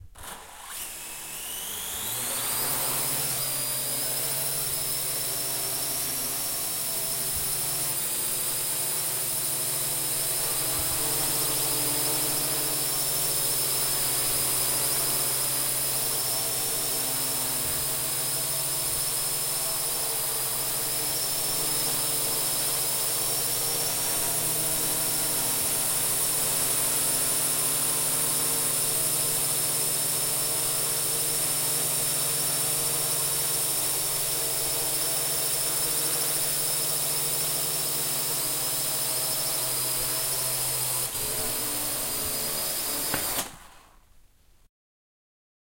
FXLM drone quadrocopter launch far T03 xy
Quadrocopter recorded in a TV studio. Zoom H6 XY mics.
close, drone, engine, flying, h6, helicopter, launch, propeller, quadrocopter, startup, warmup, xy